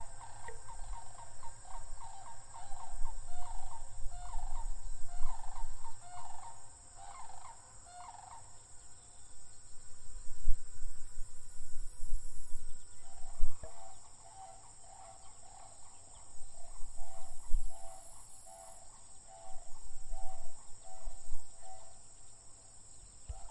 a field recording from rural wisconsin of frogs ribbeting/chirping by a pond with crickets in background
atmosphere, recording, crickets, pond, field, frogs